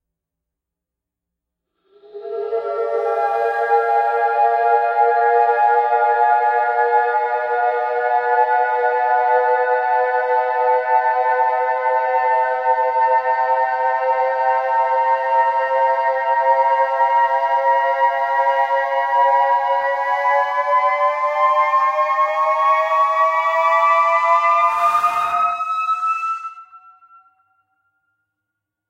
7th dominant chord flute slide
7th dominant chord played on flute
flute, dominant, 7th, chord, single